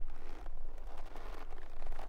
Leather saddle Twisting 01
This is a recording of a leather saddle creeking.